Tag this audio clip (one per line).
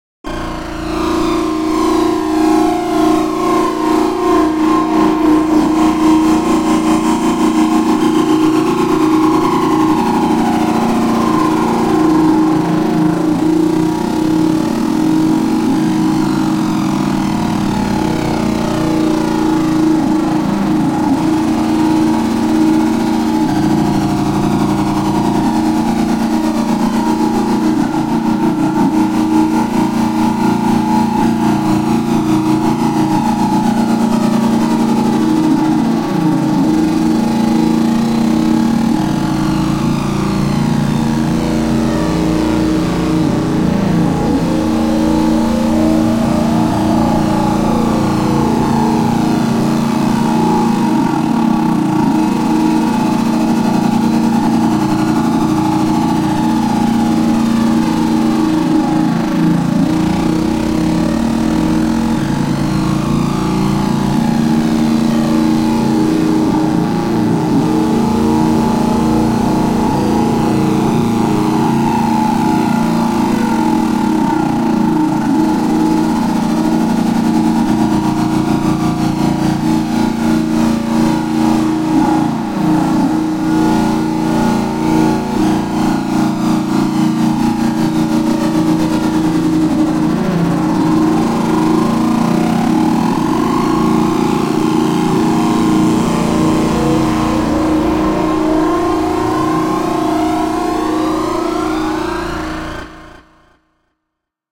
ambience
ambient
atmos
background-sound
riser
soundscape
sythesized
white-noise